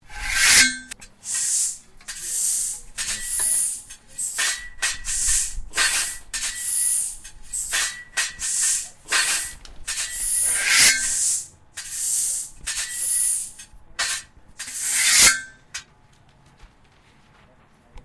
A Awesome Sword Fight
Battle, Fight, Sword